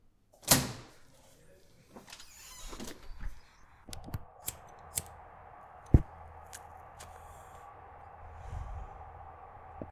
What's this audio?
smoking break winter
This sound is created with two sounds that I recorded at the university. First, the sound of the huge door to out, then the lighter (which helps to feel the cold around) and the sound of someone smoking a cigarette.
First of all, I cut the two fragment,from two different track, that I wanted for my mix. After, I first worked on the door sound. After I reduced noises, because all seemed better after noises reduced ! I made the same thing for the other sound, the lighter and smoker one.
Then I ad the last one at the end of the first one, mix a little bit to make the two sounds seemed natural together.After, I normalized the track.
At the end, I saved and export my track !
flame, lighter, cigarettes, smoke, cold, cigarette